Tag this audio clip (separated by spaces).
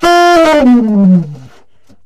jazz sampled-instruments sax saxophone tenor-sax vst woodwind